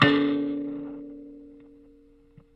96kElectricKalimba - M4harmonic
Tones from a small electric kalimba (thumb-piano) played with healthy distortion through a miniature amplifier.